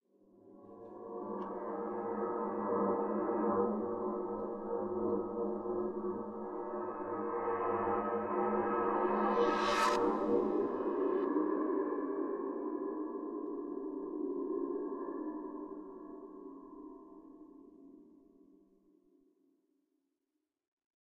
Deep large, smooth and complex one shot movement in an electroacoustic style. Made of edited home recording.
movement, smoth, slow, electroacoustic, deep, percussive